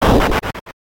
Bonk - [Rpg] 1
bonk, game, sfx, attack, hammer, free, game-sfx, hit, fx, sound-design, efx, rpg, sound-effect, ct